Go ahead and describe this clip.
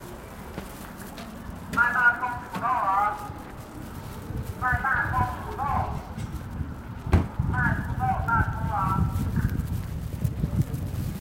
city sounds of selling overwinter vegatables
Dec 8, 2016.
Recorded in NorthEast China, with my Samsung Galaxy S7
china, city, field-recording, people, selling, voice, winter